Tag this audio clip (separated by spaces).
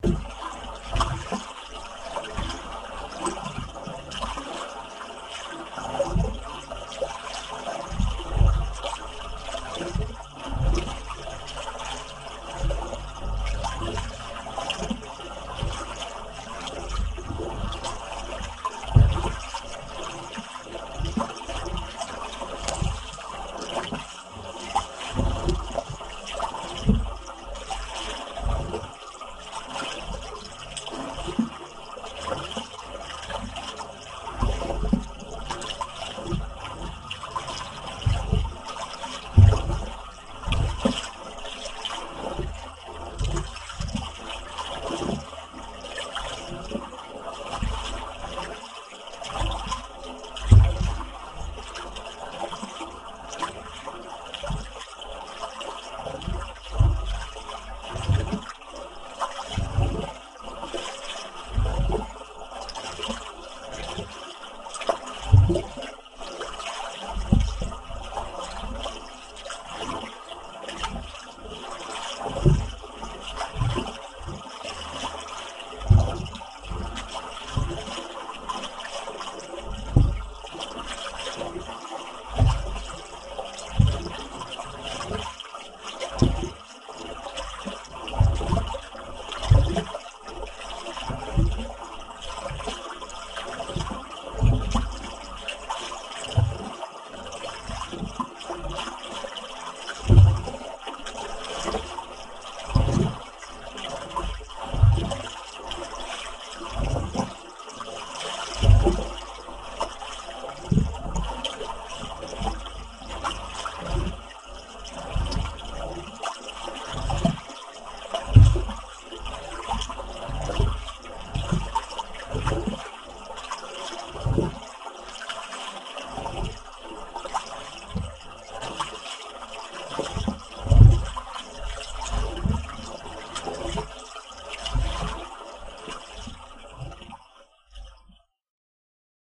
ambient field-recording movie-sound pipe sound-effect water water-spring water-tank